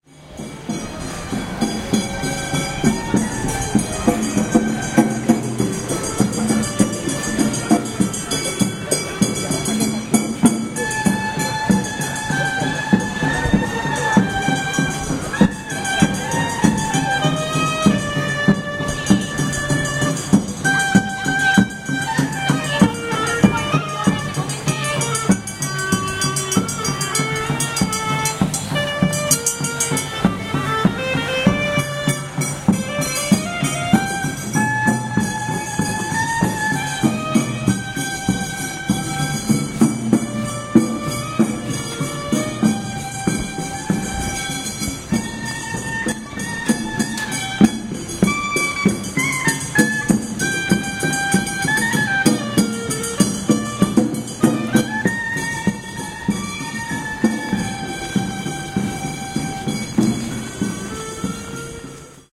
Pachinko Band - Japan
A Japanese musical group plays at the entrance of a Pachinko arcade with the intent of attracting more customers in the Asakusa neighborhood of Tokyo, Japan.